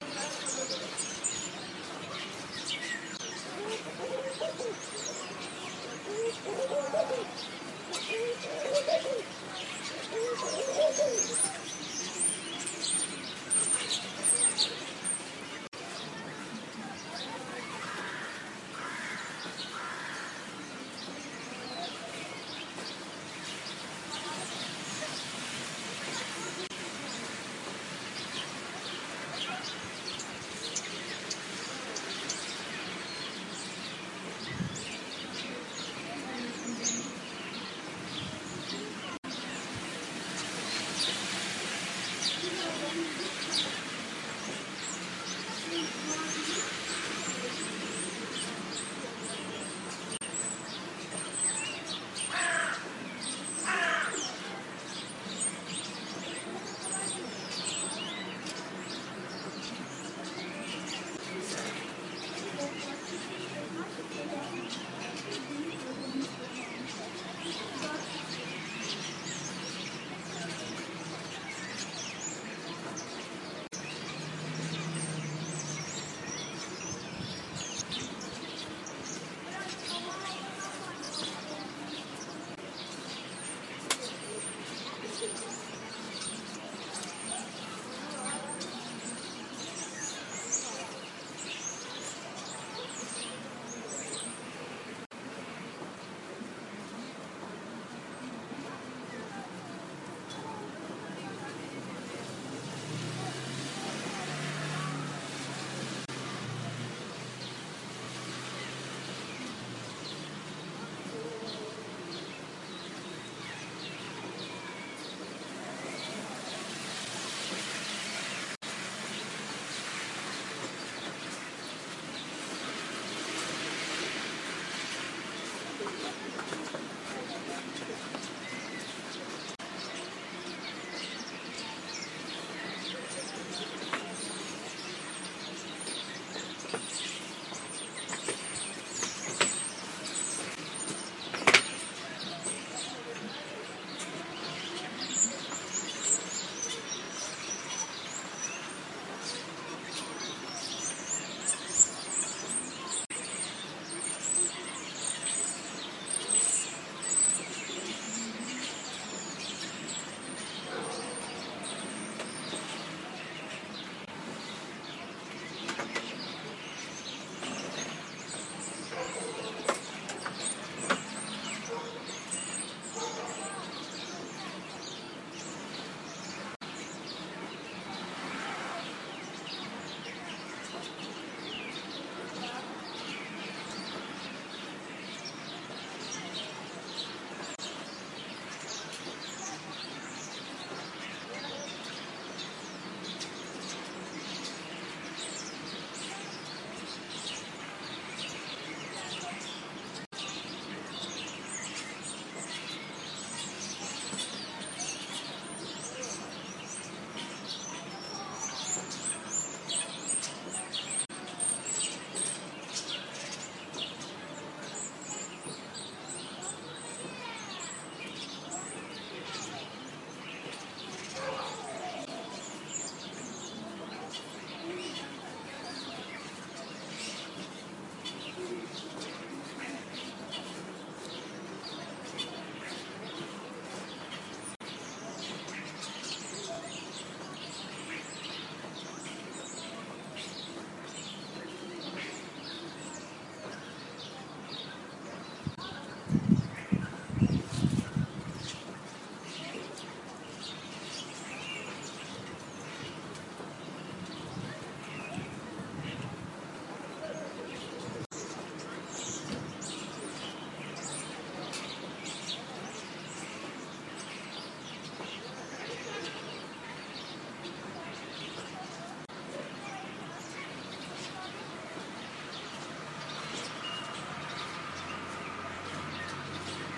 starlings / sturnus vulgaris
this year as usual some birds visited again my country, and i recorded this pretty things.